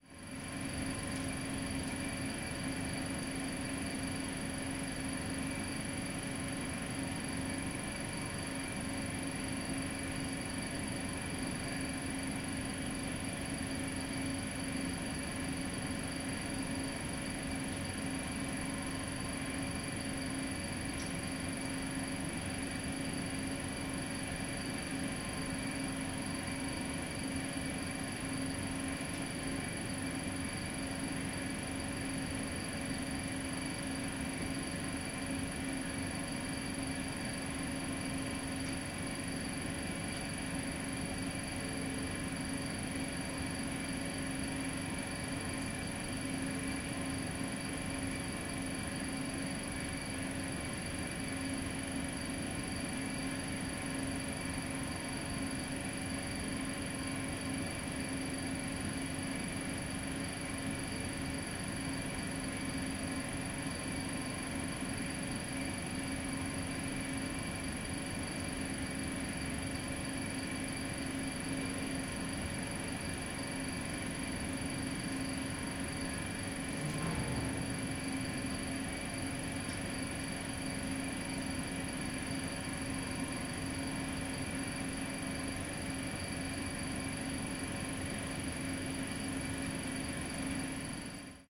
Recordings from an old swimming pool basement, there're some "motor" noises, the old purifying plant, and a boiler. Recorded with zoom h2